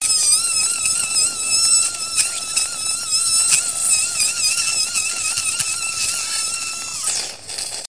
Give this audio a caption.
OP Bohrer 17
Geräusche aus einem Operationssaal: Drill noise with clinical operating room background, directly recorded during surgery
surgery, Theater, clinical, Ger, OP, Klinischer, Operationssaal, noise, Operating, OR, usche